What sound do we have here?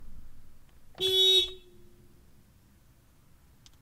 Car horn sounding